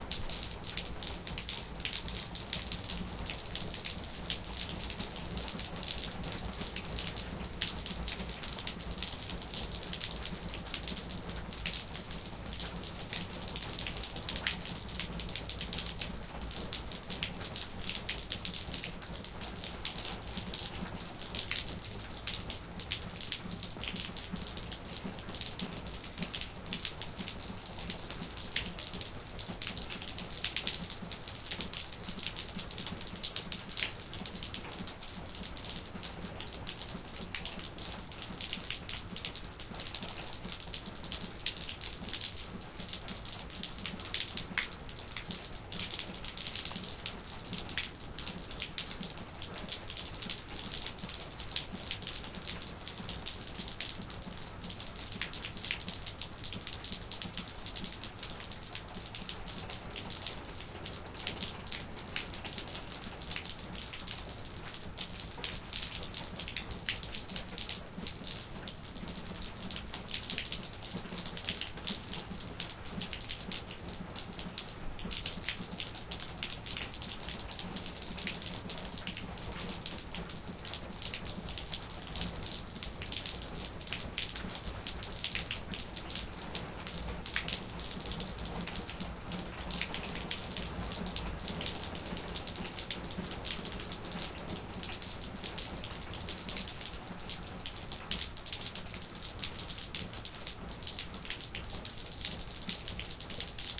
The sound is meant to be a recording of rain hitting pavement outdoors to resemble a city type rain.